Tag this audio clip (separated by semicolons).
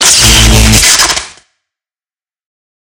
Electricity; Electric-Shock; Sound-Effect